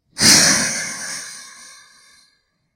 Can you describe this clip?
Alien Snake 1
The massive creature coils up as it hisses ferociously at the intergalactic hero. If this describes your sound needs you've found the perfect sound! Made by snapping the pull-tab on a soda can and paulstreching to perfection in Audacity.
I would Love to see what you make with this sound so please send me a link! Enjoy!
reptile, creature, fiction, scifi, futuristic, growl, space, snake, alien, sci-fi, science, damage, angry, hiss